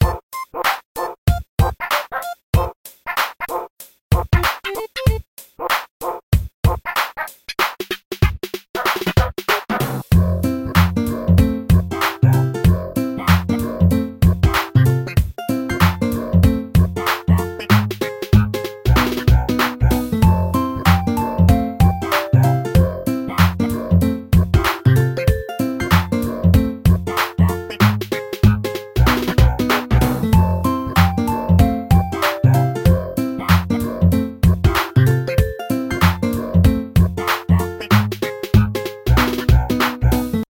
goofy chip tune, some mario paint sounds
beat, cheesy, chill, chip, chiptune, happy, loop, midi, silly, vgm, video-game